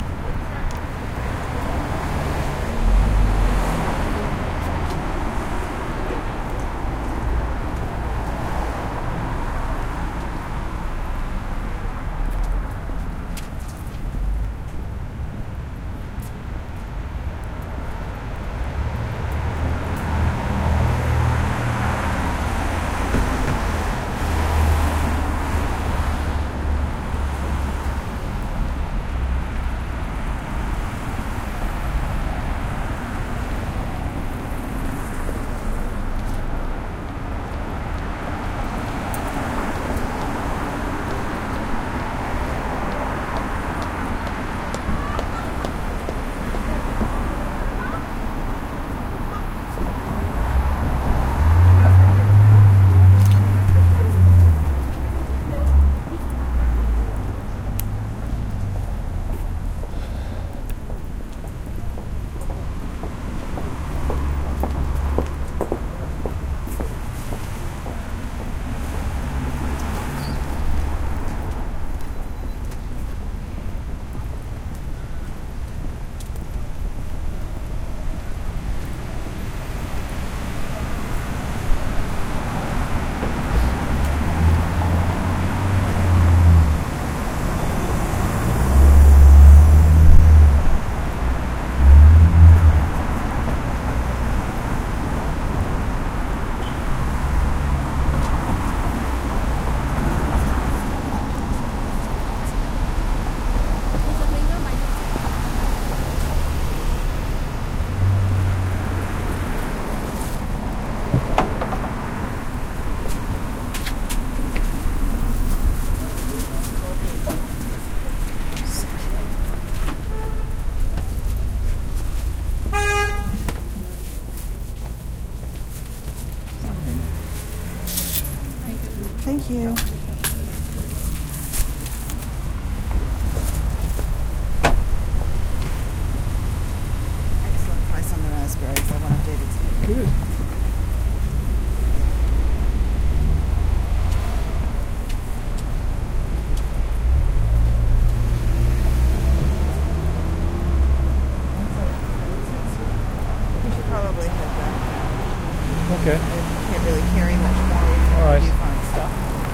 A walk through Toronto's Koreantown
A field recording in the evening of 3 Dec 2011, while walking along Bloor St W in Toronto's Koreantown. Sounds of traffic, and pedestrians.
Recorded with a Roland R05 using the unit's built-in omnidirectional stereo mikes screened with a home-made wind screen made from faux-fur.
canada, korean-quarter, toronto, urban-sounds, field-recording, city-streets, traffic-ambience